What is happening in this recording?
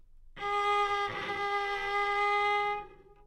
Cello - G#4 - bad-richness
Part of the Good-sounds dataset of monophonic instrumental sounds.
instrument::cello
note::G#
octave::4
midi note::56
good-sounds-id::4569
Intentionally played as an example of bad-richness
cello, good-sounds, Gsharp4, multisample, neumann-U87, single-note